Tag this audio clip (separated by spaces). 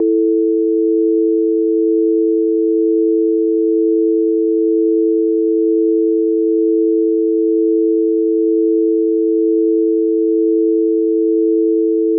330 click digital effect electric foley offset sfx sound sounddesign synth tone up wave